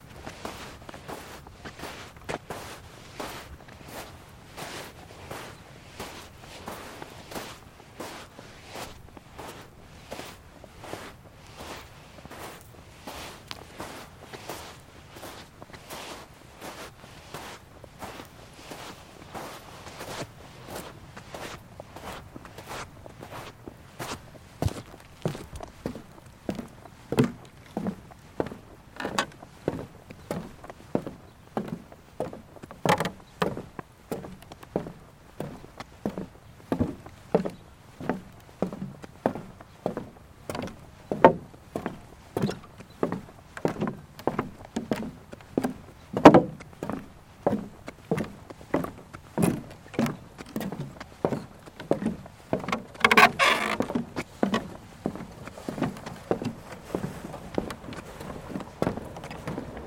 Footsteps with boots on sand to a wood walkway next to a beach.